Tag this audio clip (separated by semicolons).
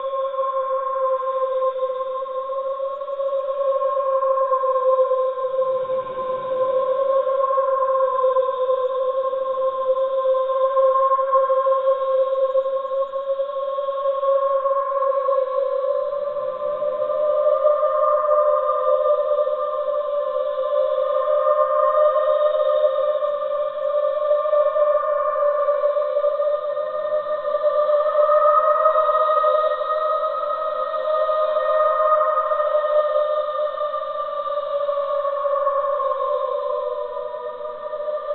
alien; Spacey; Electronic; Weirdmusic; Mysterious